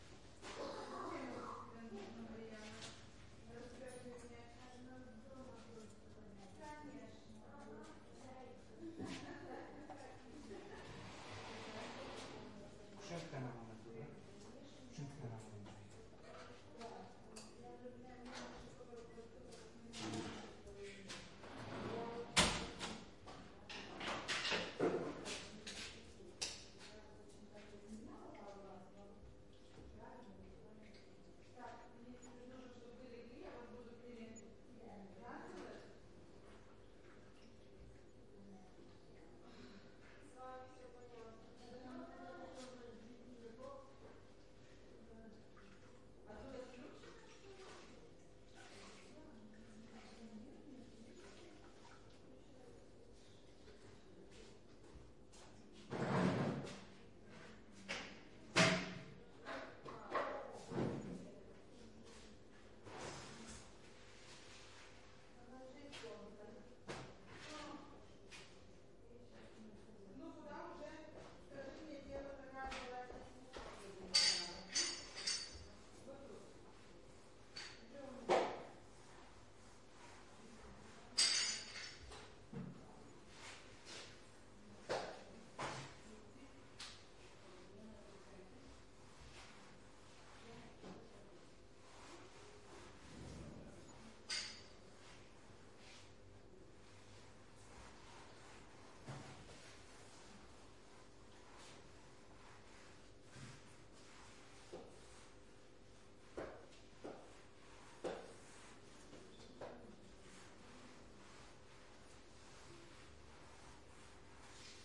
This is an ambience of morning in hospital (Vilnius). You can hear people talking in Polish and Lithuanian. People are walking around, cleaning their surroundings and someone is washing the floor.
This is MS recording.
Recorded with: Sound devices 552, Sennheiser MKH418.